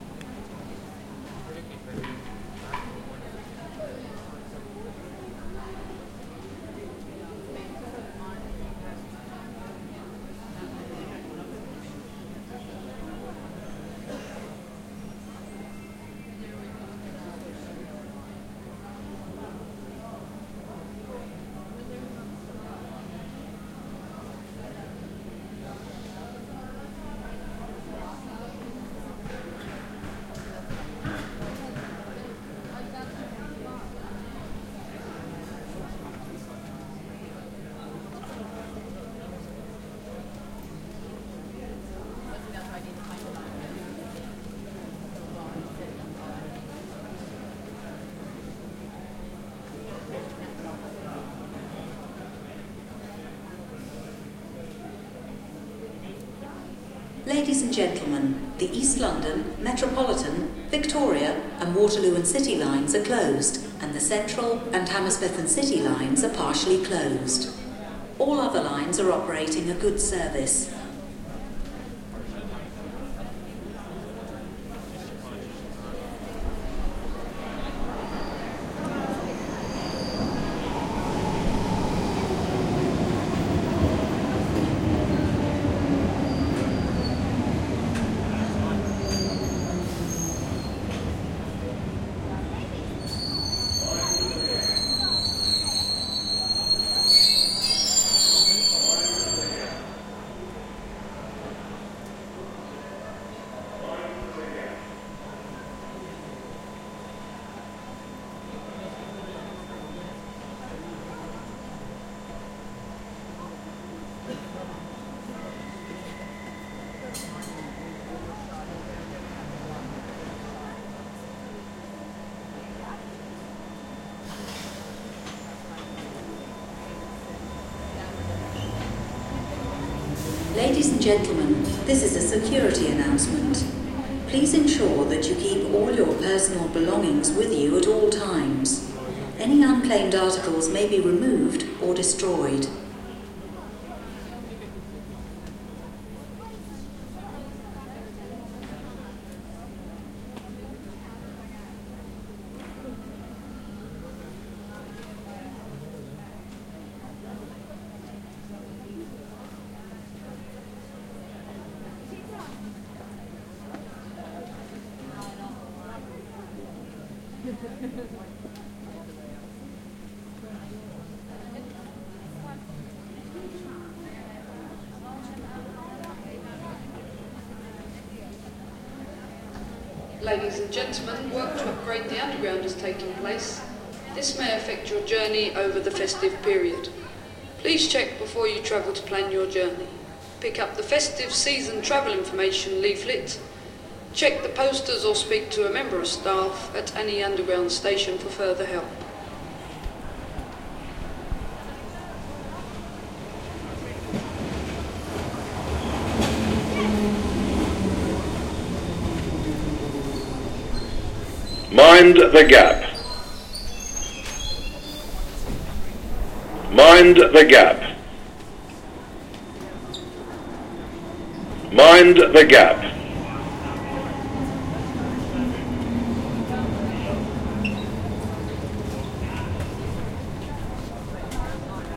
Victoria St tube station announce and Mind the Gap
General Ambience at London's Victoria Street Station tube (tunnel with narrow ceiling), medium crowded, walla. Two announcements: female voice about closed stations and security, male voice "mind the gap" (slightly distorted) Train comming and leaving squeaky. Recorded with Zoom H4 on-board mikes